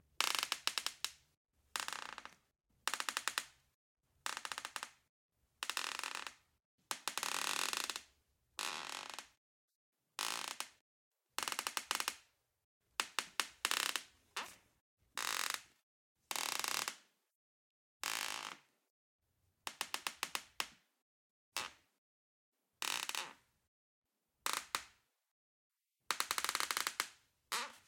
Wood Creak 04
Squeaky wood floor in my Berkeley, CA apartment. There is some natural reverb due to the recording conditions.
CAD E100S > Marantz PMD661.
wood-floor, floor, wood, wood-creak, wood-creaking, wooden, creak